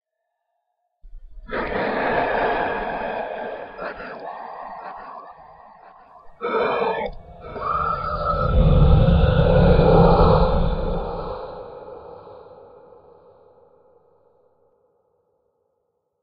Ghostly echoing call for help.
scary, unearthly, help, creepy, voice